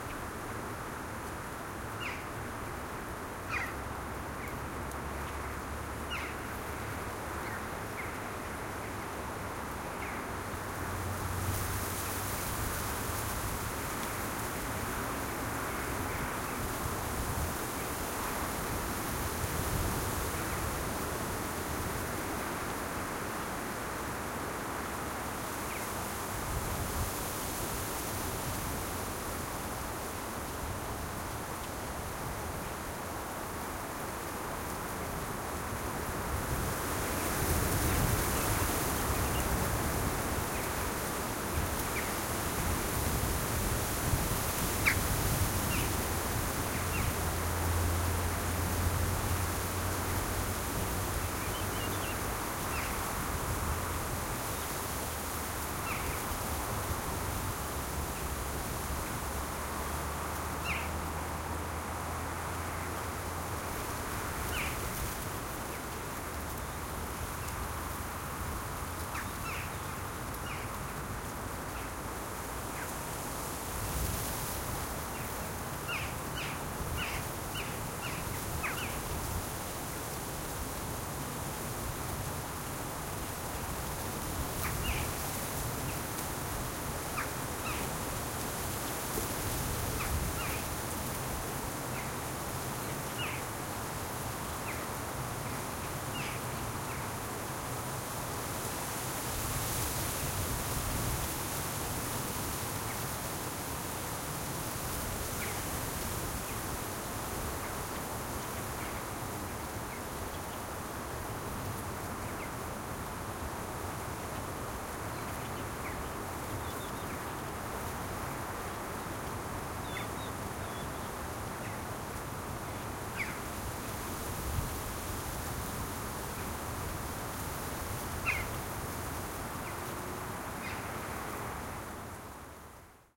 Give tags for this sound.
mistral; france; swifts; aubagne; insects; wind; grass

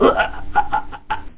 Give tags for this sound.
laugh; broken

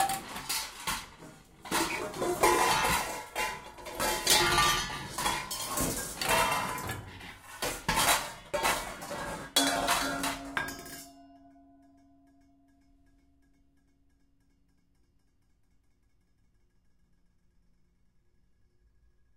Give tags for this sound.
rummaging; pans

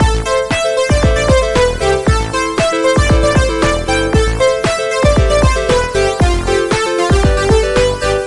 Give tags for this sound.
wave; prince; van; madonna; new

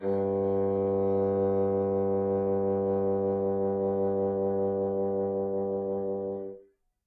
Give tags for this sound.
midi-note-43; woodwinds; bassoon; multisample; fsharp2; single-note; vsco-2; midi-velocity-31; vibrato-sustain

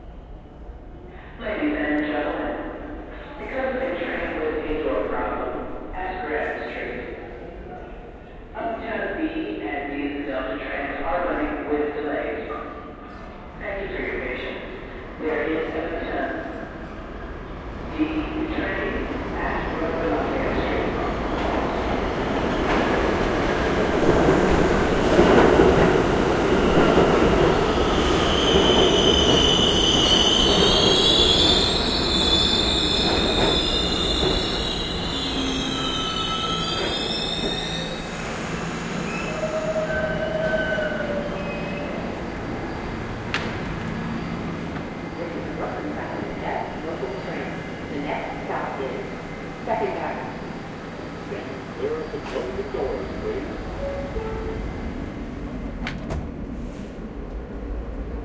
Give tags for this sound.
field-recording; NYC; subway

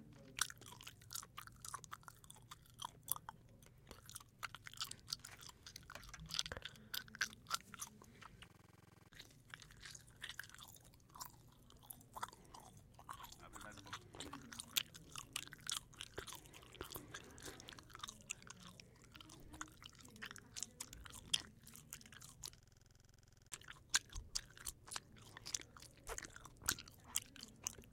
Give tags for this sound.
4maudio17; chewing; eating; gum; smacking; uam

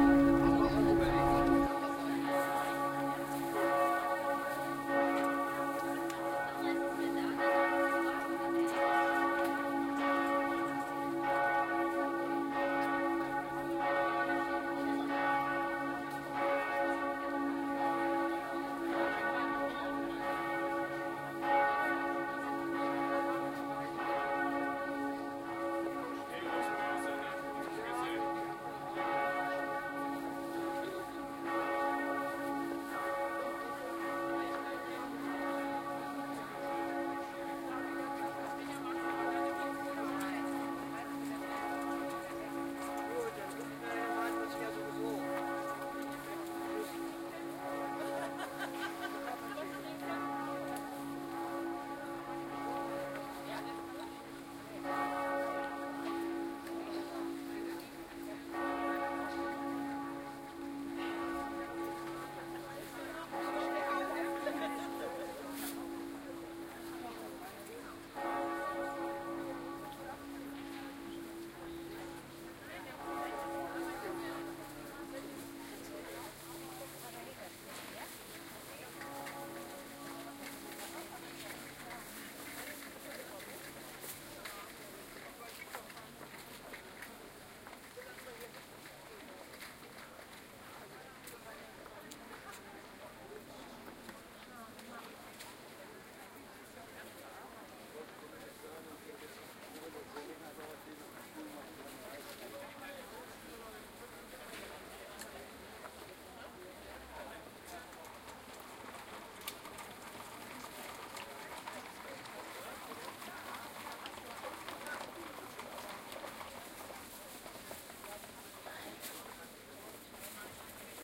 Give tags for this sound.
field-recording; bells; crowd; binaural; churchbell; market

